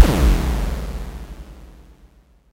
Generated KLSTRBAS 7
Generated with KLSTRBAS in Audacity.
hit, impact